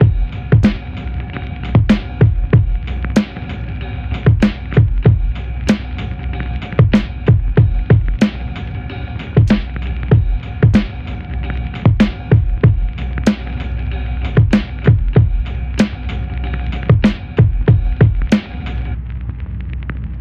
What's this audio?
Dusty Lofi Drum Loop 95 BPM
loops, Lo-fi, Loop, samples, boom, chill, oldschool, vinyl, hiphop, bap, drums, old, 76, lofi, drum, BPM, dusty, music, school, sample, pack